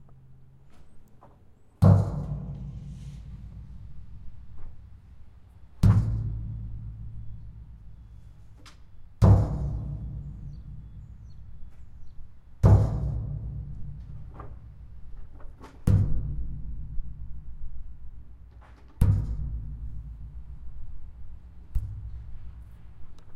Industrial Drums bang
Stereo
I captured it during my time at a lumber yard.
Zoom H4N built in microphone.
metalic, operation, start, factory, machinery, workshop, MACHINE, sounds, mechanical, industrial, Power, engine